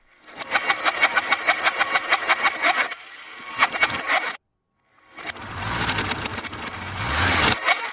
delphis SUZI COLD START LOOP #120 reverse
Cold Start Suzuki GSX 1100e engine sounds while the engine was cold.
120bpm, engine, gsx, motor, suzuki